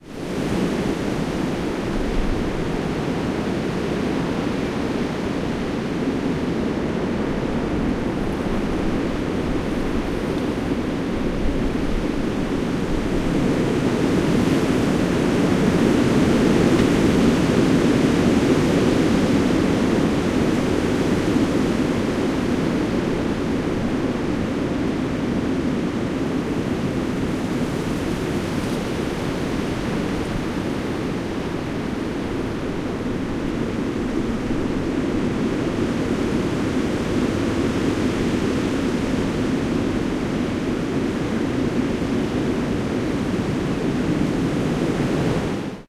windy wood
A bit of a gale really..
Gusting to 60mph locally. Recorded in garden adjoining leafless deciduous woods (front and above). Wind from south (right side). DIY Dummy head binaural recording, Quad Capure to PC. Large dead cat windshield, and bass cut, used.
Loses quite a lot with the built-in player's lossy compression.
3d, binaural, binaural-imaging, binaural-nature-recording, dummy-head, field-recording, gale, gusts, headphones, nature, storm, trees, wind, woods